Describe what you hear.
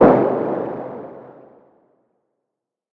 Blip Random: C2 note, random short blip sounds from Synplant. Sampled into Ableton as atonal as possible with a bit of effects, compression using PSP Compressor2 and PSP Warmer. Random seeds in Synplant, and very little other effects used. Crazy sounds is what I do.
processed, electro, club, bounce, acid, dance, sound, synth, electronic, porn-core, synthesizer, techno, 110, noise, glitch-hop, hardcore, glitch, rave, trance, blip, resonance, random, sci-fi, effect, bpm, dark, lead, house